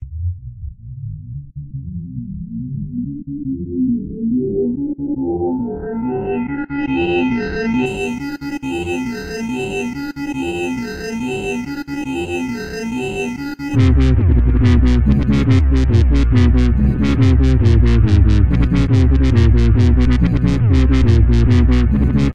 dubstep blood bath
fl studio dubstep with synth and bass drop
dubstep, low, beautiful, effect, bass, grime, wobble, sub, Dub, substep, Wobbles